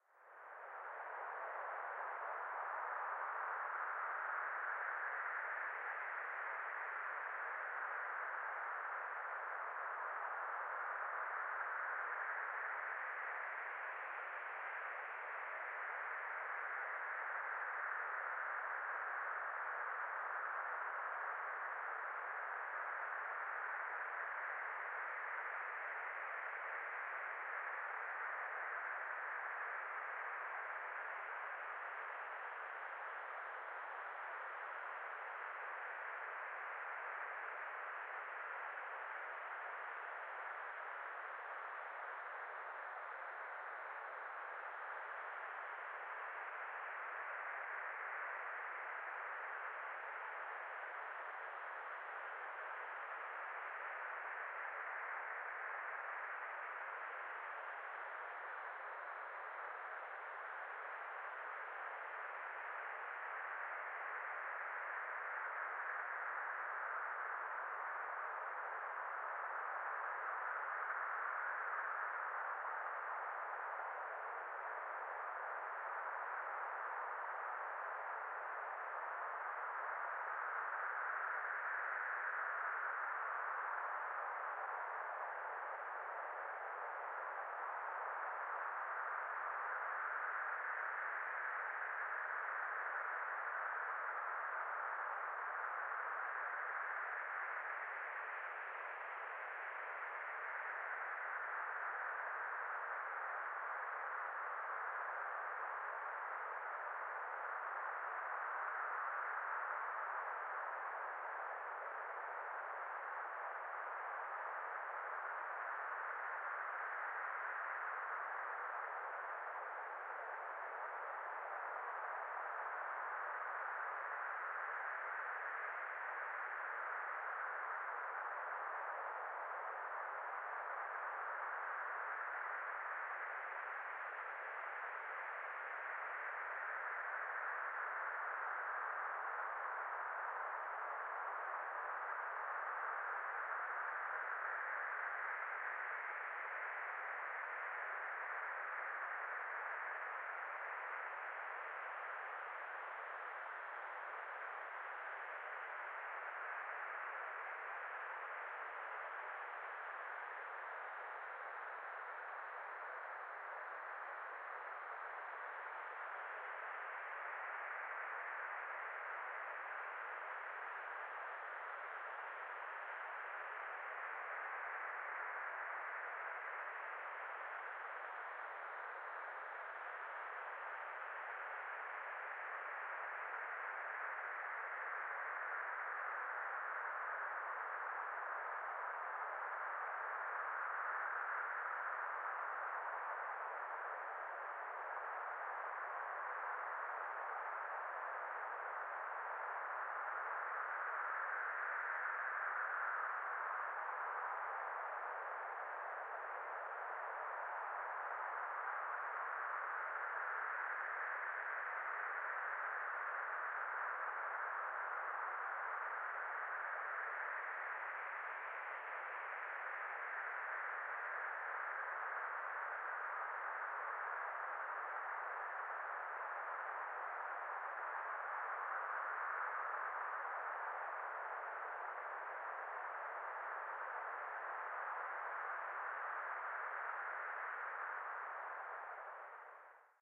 wind-noise
A clean simulation of wind blowing, made by filtering white noise.
blow, blowing, desert, filtered-noise, howling, wind, wind-blowing, windy